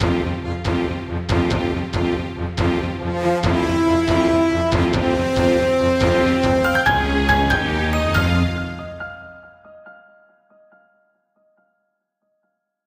Cheesy morning news tune
A short, crappy jingle I composed for a short film